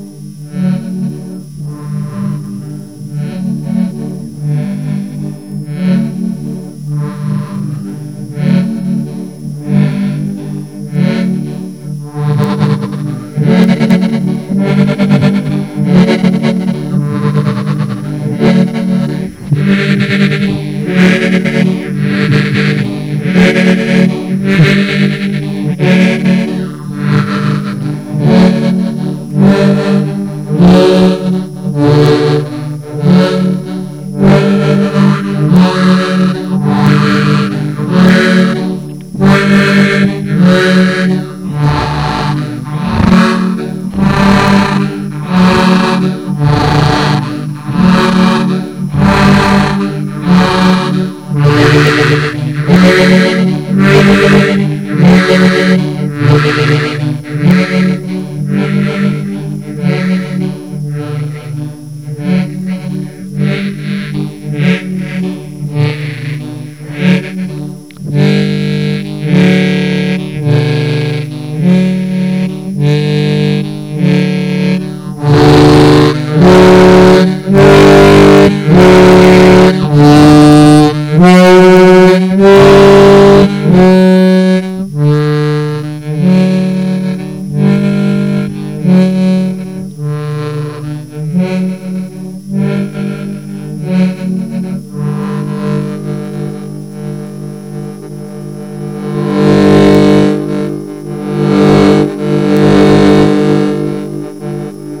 Mouth-organ1
Moth-organ is a forgotten instrument. Sad, because it's amasingly useful, and a professional (which I am not)can create many interesting variations. I play only three tones, but listen, it's a cool little thing.I used the best available: Hohner 'Rheingold'.
modulation, mouth-organ, music